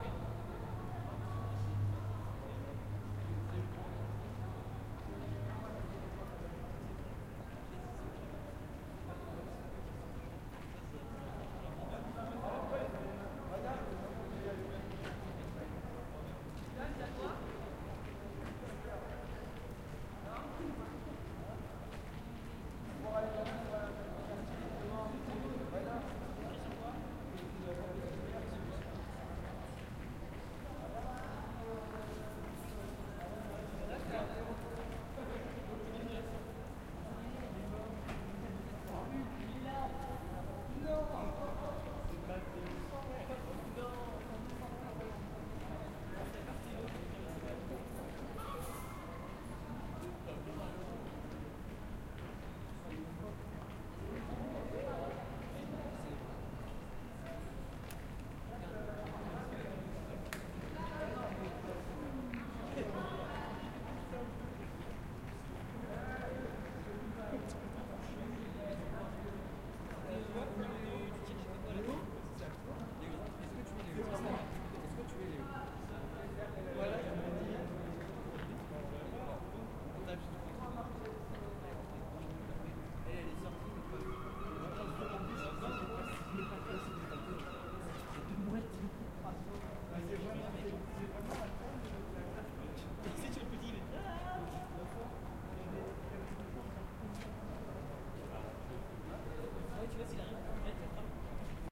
Quiet museum courtyard
The outside courtyard at the National Museum of Ireland - Decorative Arts & History, Collins Barracks, Dublin, Ireland. A small group of people in the distance speak to each other in French. You can hear people occasionally walk by, and the sounds of seagulls and distant traffic.
seagulls, museum, atmosphere, traffic, noise, ambiant, French, soundscape, birds, outdoors, people, ambience, general-noise, ambient, courtyard, general, city